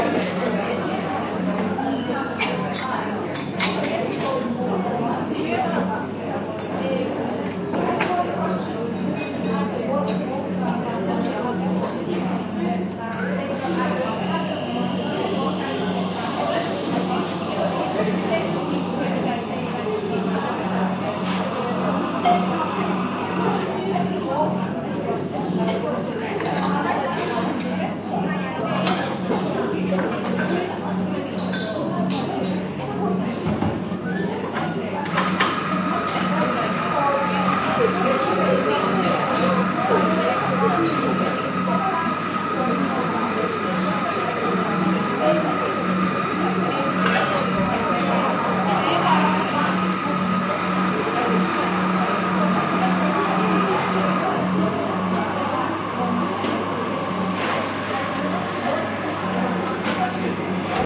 May 11 cafe 2
This is a 60 second sample of ambient atmospheric sound taken in a coffee shop / cafe, made for the Open University's Digital Film School (T156). Female voices in the back ground. Chinking cups and spoons sounds of the grinder and coffee machine.
atmospheric, cafe, coffee-machine, happy